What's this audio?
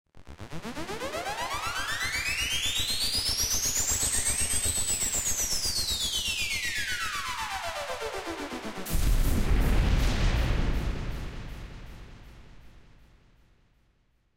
explosion a lo toriyama
manga, sinth, phaser, toriyama, explosion, anime